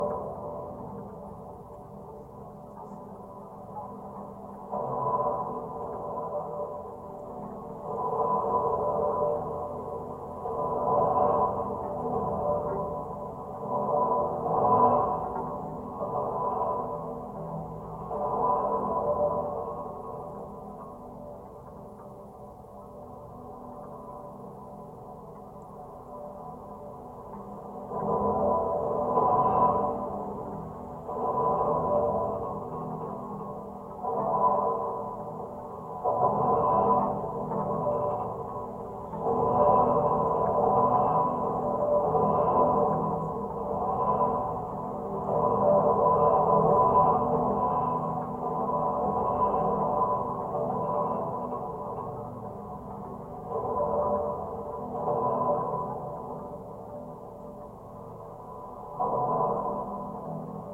Contact mic recording of the Brooklyn Bridge in New York City, NY, USA. This is the inner safety handrail cable of the Brooklyn side inner southwest catenary. As with the Golden Gate’s equivalents, the handrails really sing and have a wide harmonic tonal range without a lot of automotive “punctuation.” Recorded April 11, 2011 using a Sony PCM-D50 recorder with Schertler DYN-E-SET wired mic attached to the cable with putty.